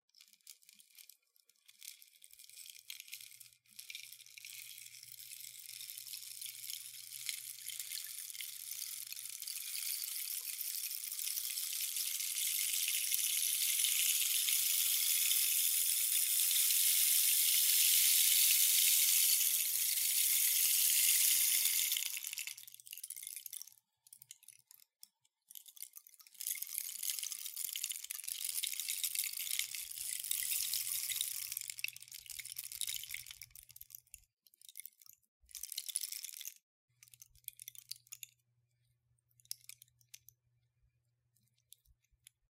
rain stick long
an unprocessed sample of a small rainstick. recorded using Adobe Soundbooth, noise reduction applied to eliminate room hum. Mic: Sterling ST-66 large tube condenser.